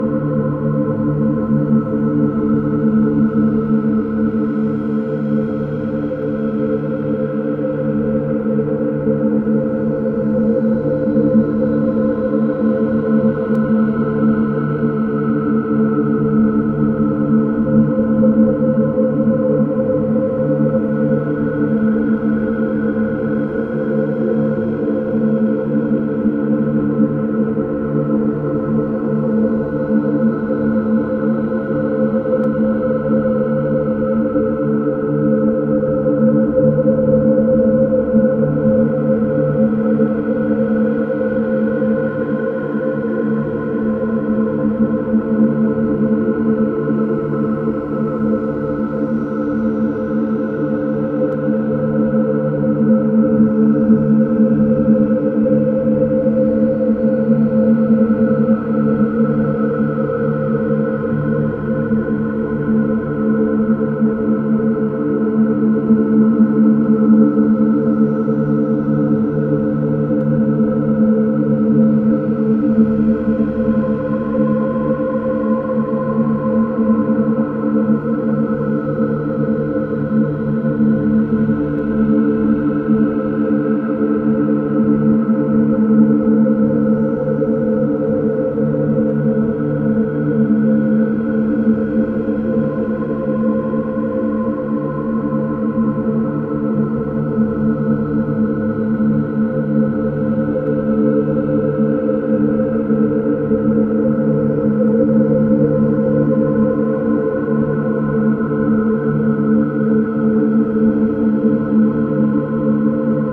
Fragment from A DISTANT PAST -12 [loop]
Arturia MicroBrute with some additional modulation coming from a Doepfer A-100.
Processed by a Zoom MS-70CDR mulit-effects pedal and captured with a Zoom H5 portable recorder.
Some slight tweaks in the box, including -1 octave pitch-shifting.
Originally I used it for this piece/video:
It's always nice to hear what projects you use these sounds for.
ambience, eerie, horror, spooky, haunted, digital, dark, dark-ambient, drone, ambient, sinister, atmosphere, loop, weird, scary, creepy, analog